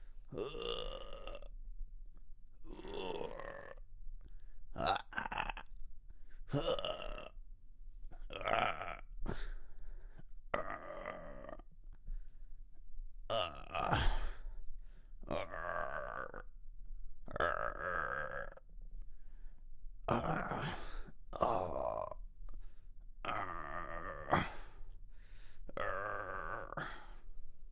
Man in some light pain
Recorded these sounds for a character with back pain. Recorded with an Audio-Technica Shot gun mic to a Tascam DR-60DmII.
sound, complain, old, man, sfx, back, male, painful, effect, ache, hurt, pain